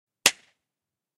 A handclap with short echo, made in the mountains, processed with a noise-limiter
clap, dry, handclap, processed, slap